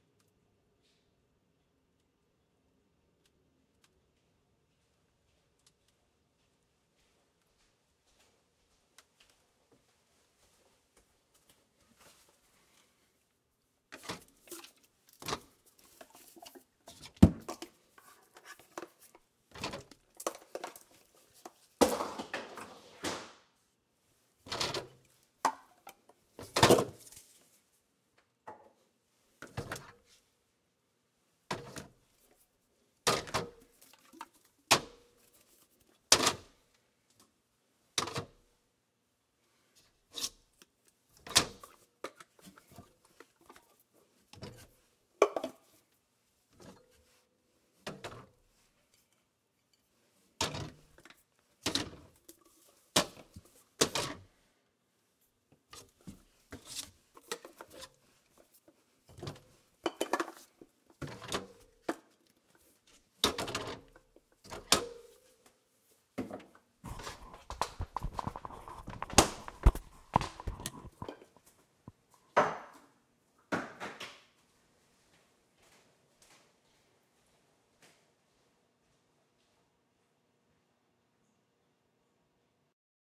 Assorted can foley
Food cans being handled
foley, dead-season